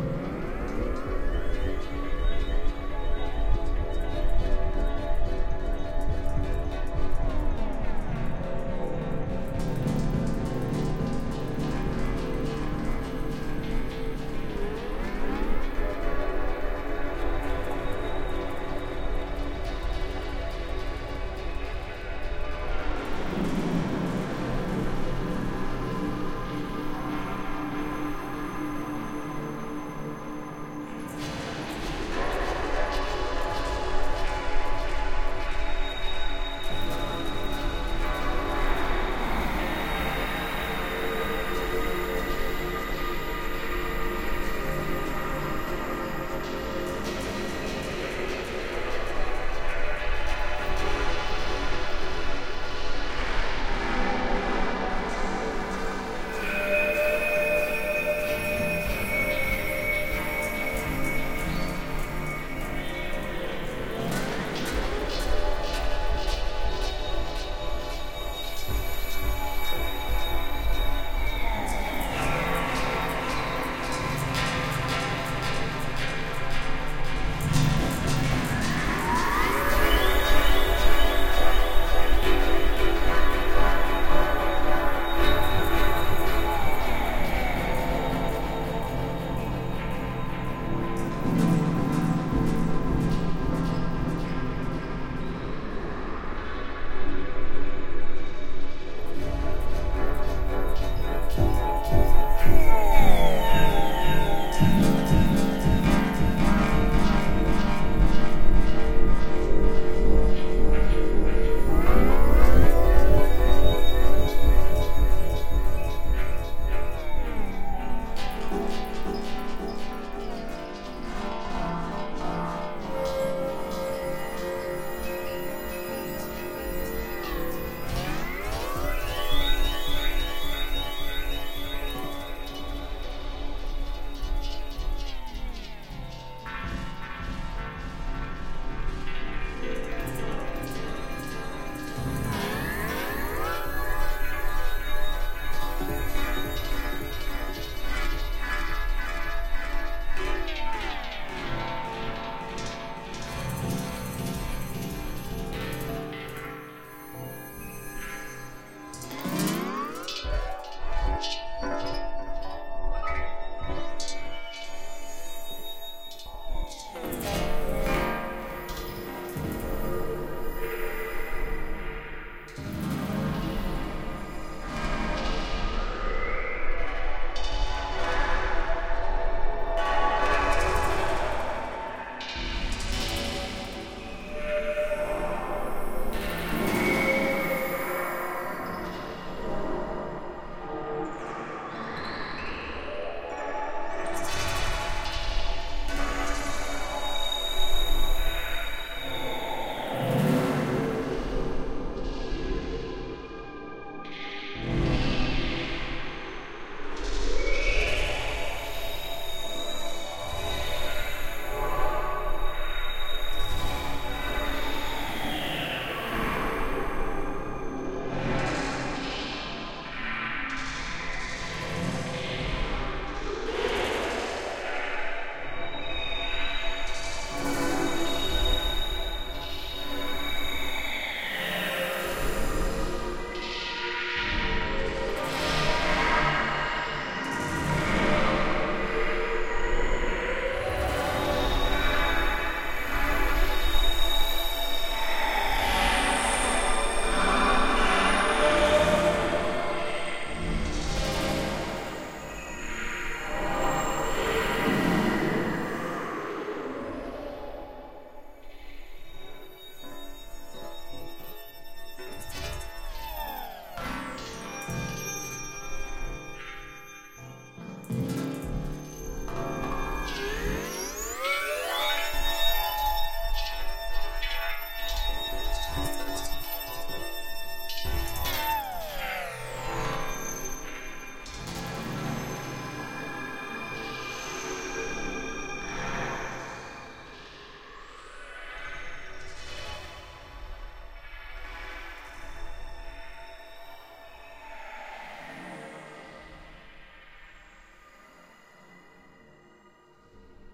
A soundfx I created with Reaktor 5 in Ableton. Sci-Fi meditation for you, enjoy!
delay, fx, atmosphere, effect, future, soundesign, abstract, pad, experimental, deep, sci-fi, sfx, sound-design